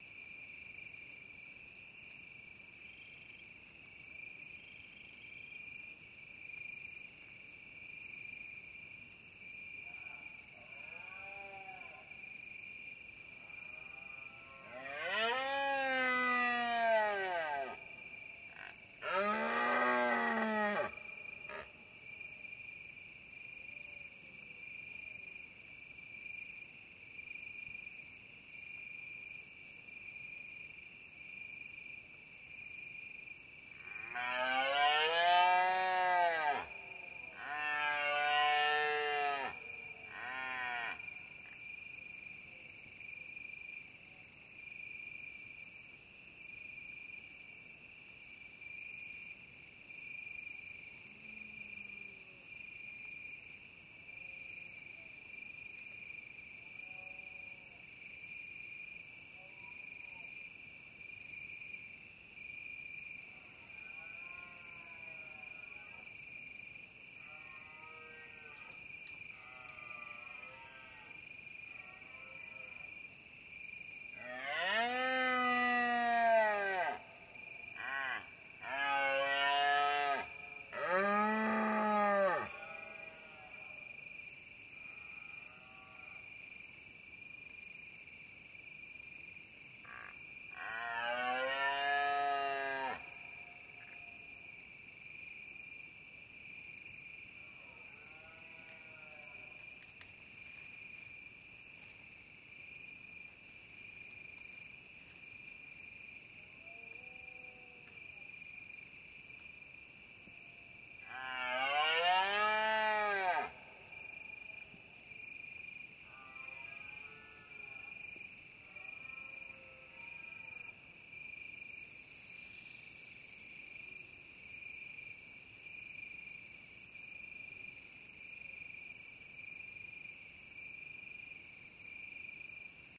Recorded in Sierra de Andujar next to Santa Elena (Jaen). In this recording you can listen to the bellows of deers. This event take place in the last weeks of September and early October called in spanish "berrea". Fostex FR2-LE. Rode NT4. 21/09/2008. 02:32.